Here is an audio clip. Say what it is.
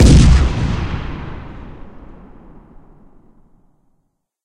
cannon boom9
A big sounding explosion.
big, boom, cannon, explosion, large